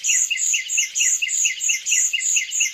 looped bird tweet